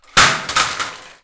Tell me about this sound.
Ladder Drop 1
If a ladder falls in a studio and no one is around to hear it, does it make a sound?
Good thing I had my audio recorder running.
And the answer is yes if you were wondering...